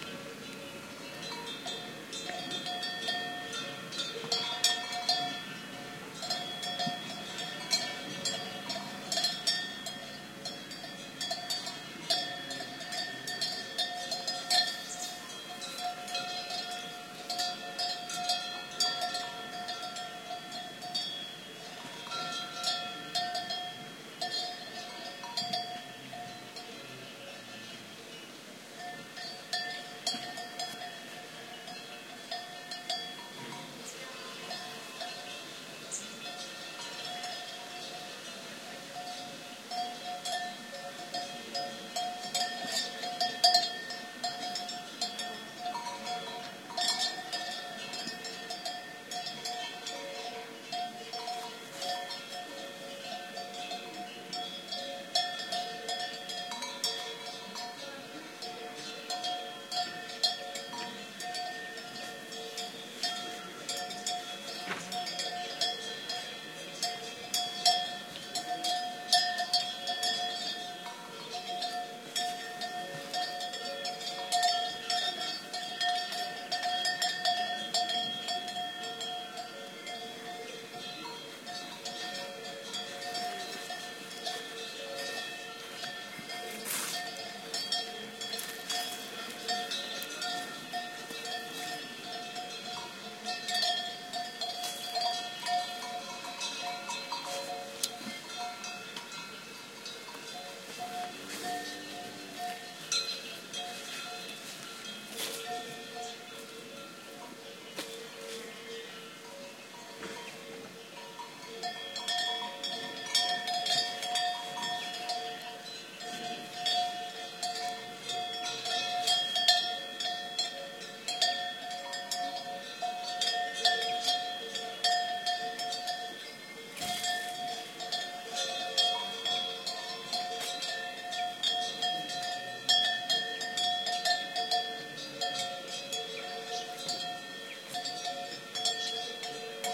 20110804 cow.bells.12
cow bells in mountain area. Recorded near Ermita de la Virgen de la Loma de Orio (Villoslada de Cameros, Spain). Shure WL183, Fel preamp, PCM M10 recorder.
ambiance, bell, cattle, cow, field-recording, nature, rioja, spain